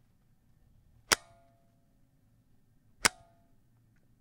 Hitting Metal.R
Hitting metal several times
Metal, clank, sound-effect